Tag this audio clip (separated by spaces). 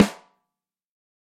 multi pro-m m201 velocity beyer mapex sample drum snare 14x5 dynamic